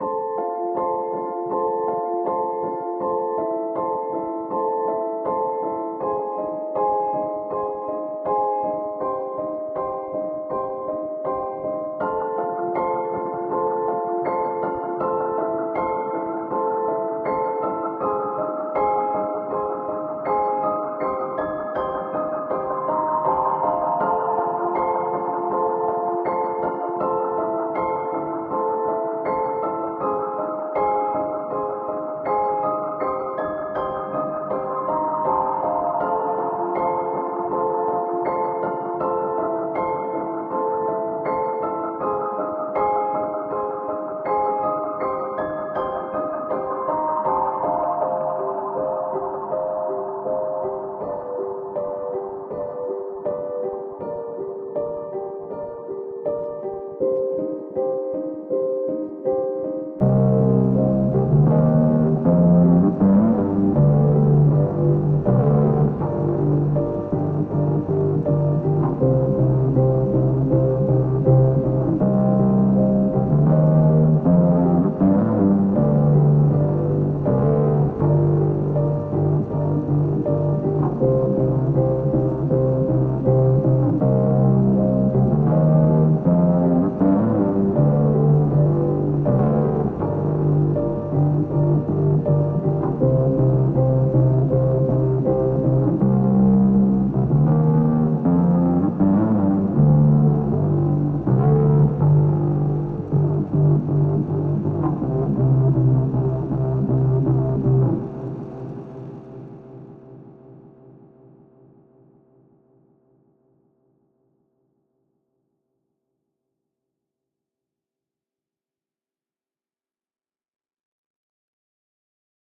A short melody made using josefpres' Dark Loops samples.
This is a haunting yet peaceful melody. I imagine someone returning to the surface after a nuclear war, just for their geiger counter to bark up at them, in the barren wasteland that once used to be a bright, sprawling city just a few hours ago.